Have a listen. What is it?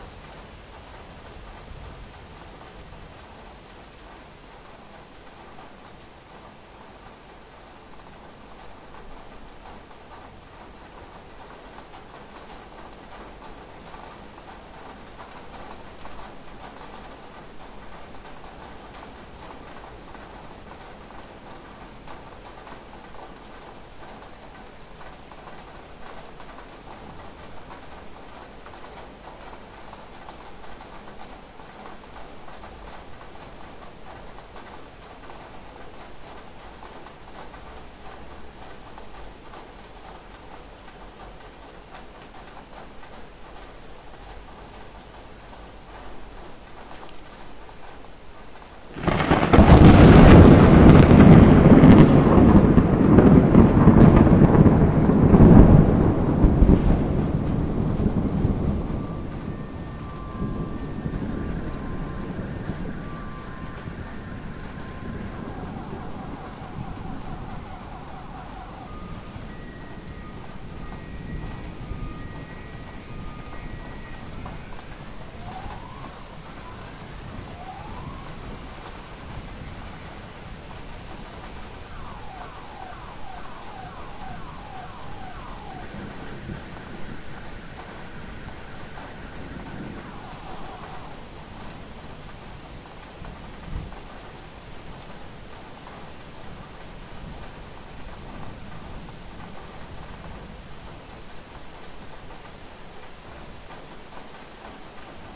B rain & thunder lightning close & cars sirens loop
lightning, loop, nature, storm, weather